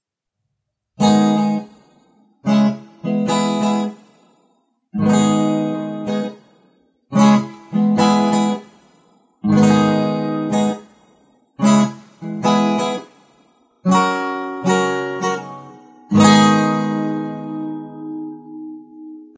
A little four chord riff, using the chords Em, Am, C, D (chord forms, I think the capo was on the 4th fret). Recorded on a cheap microphone. Some processing was done (hiss reduction).